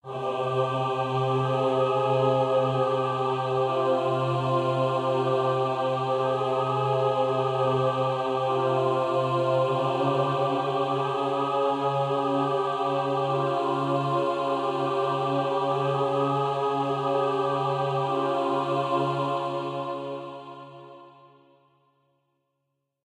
ch3 100bpm
These sounds are made with vst instruments by Hörspiel-Werkstatt Bad Hersfeld